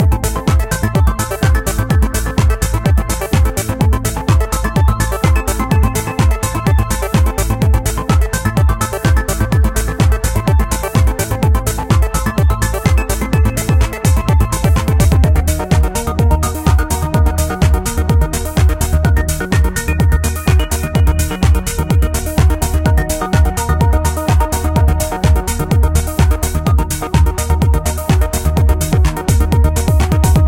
A speedy loop with arpeggios